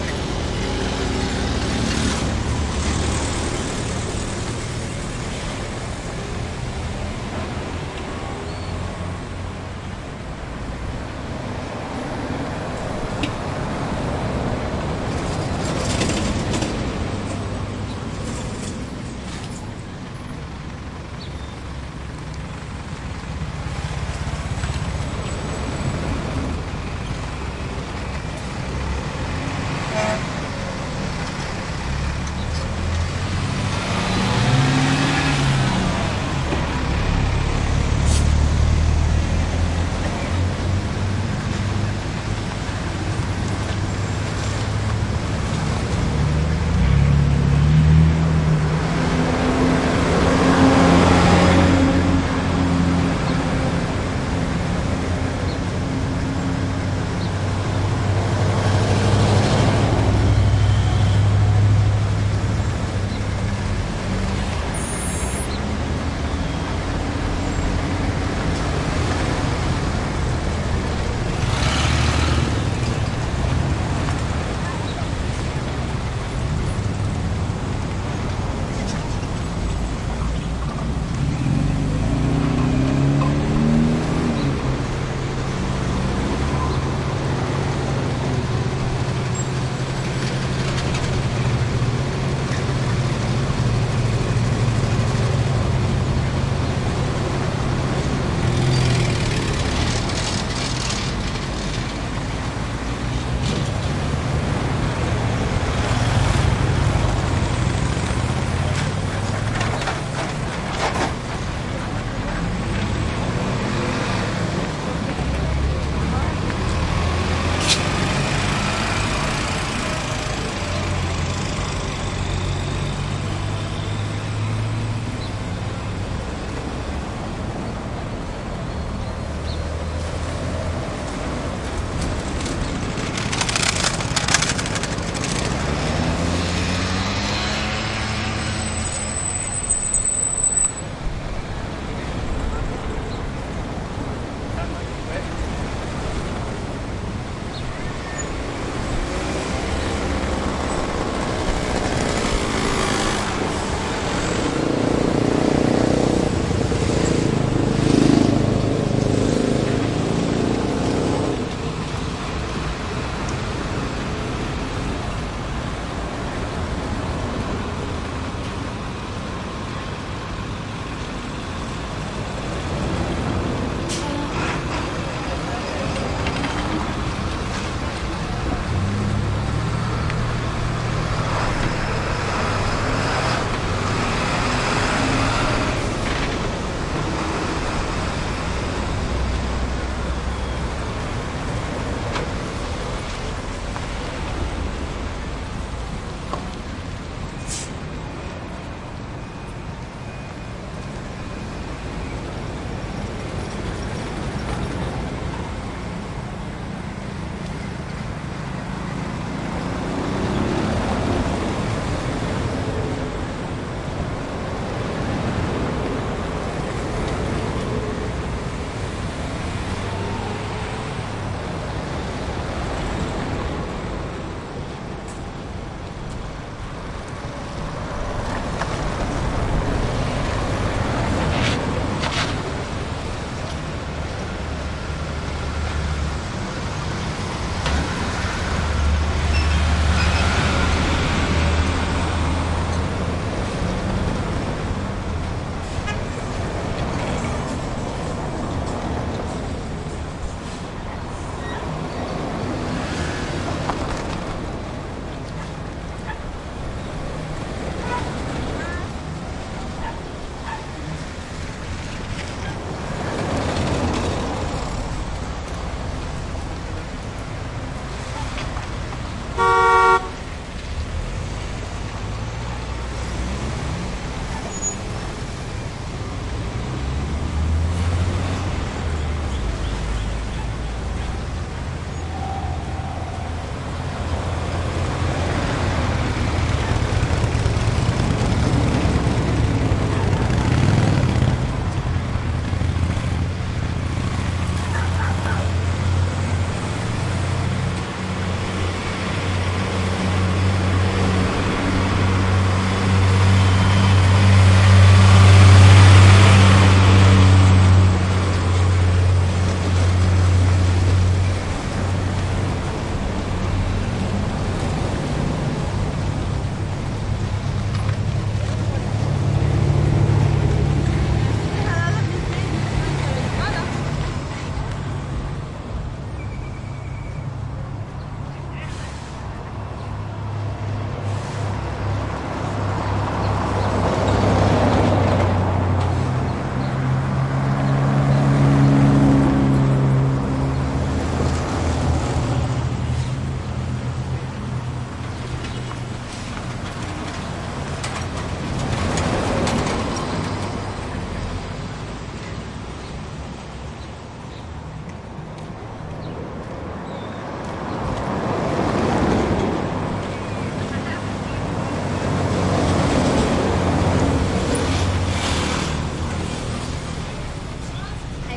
traffic medium throaty slow intersection cars trucks mopeds motorcycles soft Oaxaca, Mexico
cars, intersection, medium, Mexico, mopeds, motorcycles, Oaxaca, slow, throaty, traffic, trucks